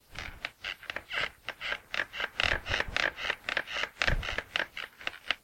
Bed Sex Sounds
Bed Sounds when making love.